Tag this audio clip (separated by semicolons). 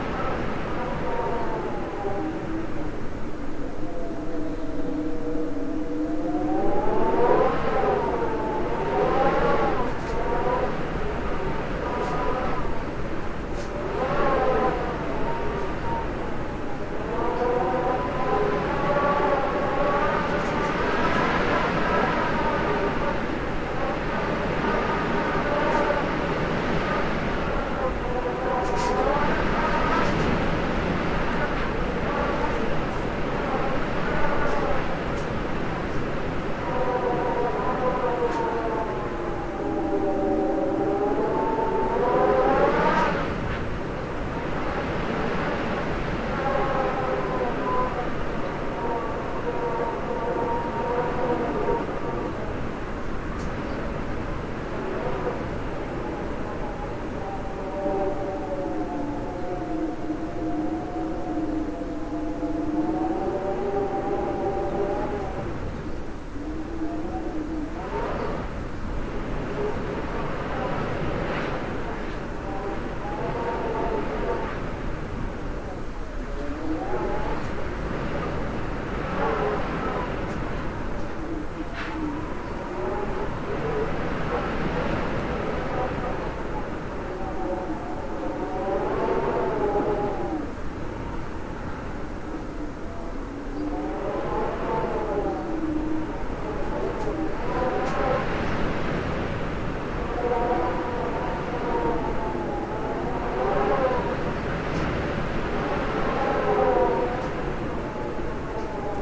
wind; storm